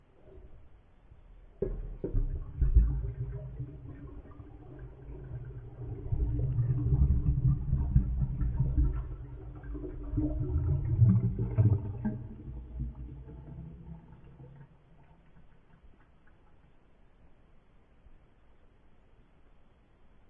Mono recording of water falling from an opened tap into the sink. Pitched down
pitched, sink, slow